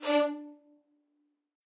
One-shot from Versilian Studios Chamber Orchestra 2: Community Edition sampling project.
Instrument family: Strings
Instrument: Violin Section
Articulation: spiccato
Note: D4
Midi note: 62
Midi velocity (center): 95
Microphone: 2x Rode NT1-A spaced pair, Royer R-101 close
Performer: Lily Lyons, Meitar Forkosh, Brendan Klippel, Sadie Currey, Rosy Timms